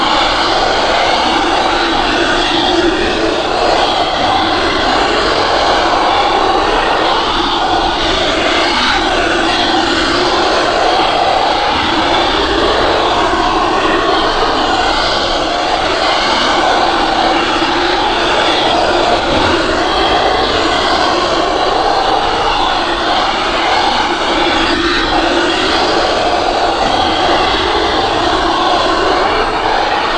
Roars loop
A loopable and intense sound/noise of roars/growls.
Used in a game for a ghost hunting the player.
Made with Audacity, editing my own voice.
beast, beasts, bizarre, creature, creatures, creepy, dark, evil, game, game-sound, ghost, growl, growls, haunt, horrific, horror, intense, loop, loopable, monster, noise, noises, processed, roar, scary, unearthly, unsettling, weird